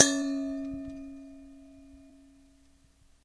PliersHittingRim 1-SM58-8inAway
I struck the rim whilst the bowl was hanging vertically from 1 wire. The Shure SM58 mic held approximately 8" away from the interior of the bowl.